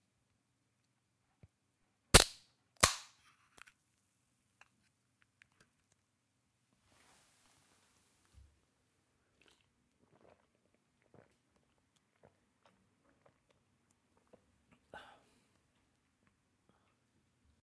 Beer Can / Obrir una llauna de cervesa
La primera cervesa, al migdia.
The first beer, at noon.
Tech Specs:
- Wireless mic Sennheiser ew500 G2
- Zoom H4n
ahh, beer, beure, beverage, can, cervesa, drink, fizz, fuzz, gas, llauna, migdia, obrir, open